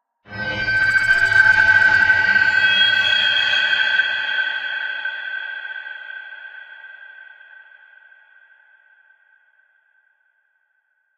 Dark Texture 1
Sound for Intro to your movie or game horror story.
Enjoy! It's all free!
Thanks for use!
booom, dark-texture, scary, horror, movie, intro, deep, dark-ambient, film, creepy, zombies, fx, pad, background, cinema, hollywoodfx, trailer, dark